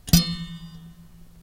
junk box002a
A short springy noise with a dissonant undertone.
noise, spring